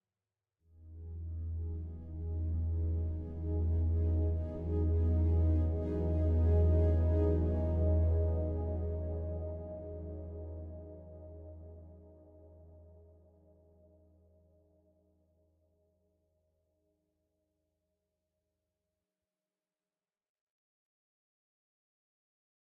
a synth pad chord